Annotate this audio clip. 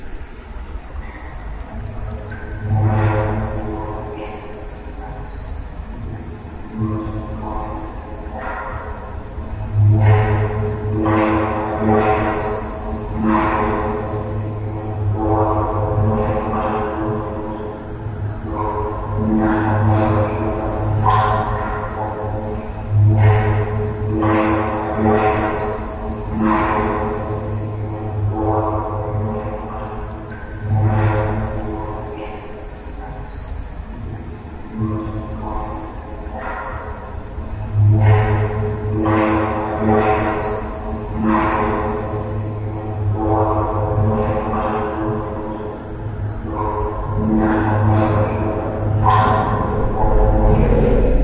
Sound of a underground water reservoir recorded through a ventilation pipe. Giving a mystical sound with a nice abstract quality.